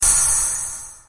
Cymbol (Homemade)
Drums, Heavy, Metal